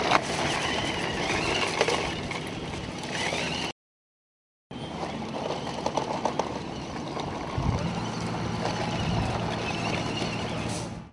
EOD Robot Gravel Movement
Remote controlled EOD robot sounds when moving around on gravel.
army, engine, military, modern, modern-technology, motor, movement, soldier, vehicle, warfare